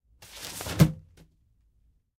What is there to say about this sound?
umbrella open
an umbrella being opened